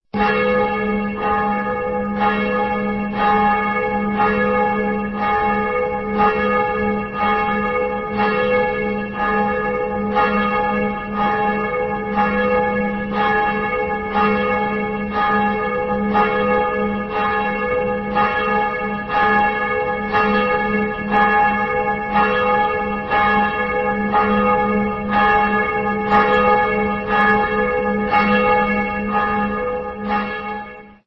this is a Kölner dom bell :angelusglocken.videotaped and edited to make it audio(record it the video myself with a blackberry phone!)
glocken, kolner, bell